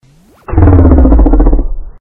demonic growl
snarl growl monster noise demon demonic scary eerie alien